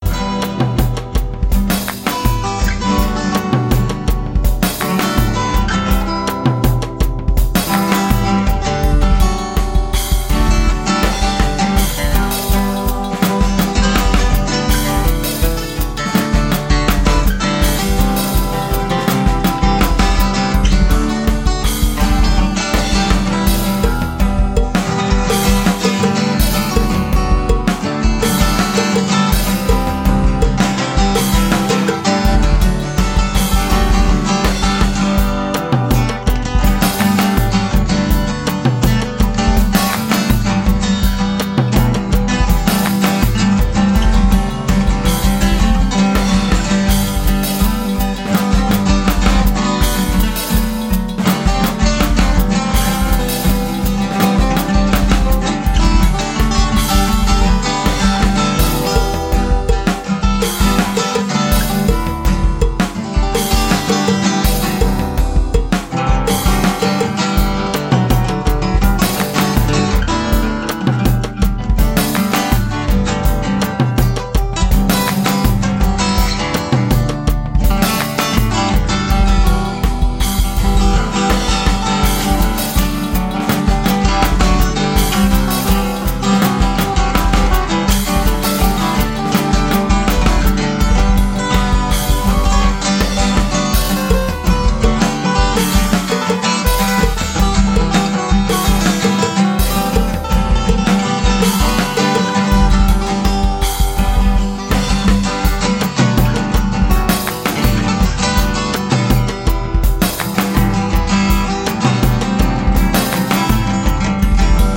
Mi Amore
A short loop cut from one of my original compositions. Equipment: Zoom R8, Ibenez Acoustic Guitar, Hydrogen Drum Software, Acer Laptop and Audacity.
120, acoustic, backing, beat, BPM, guitar, Latin, loop, rhythm